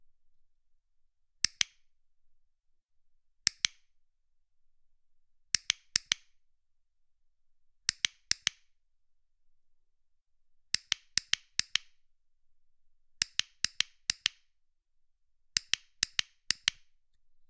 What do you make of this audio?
Metal Clicker, Dog Training, Mono, Clip
Recording of a small hand held metal and plastic clicker for dog training.
Recorded mono with Edirol R44 recorder and Shure SM81 Microphone.
snap, dog, metallic, click, training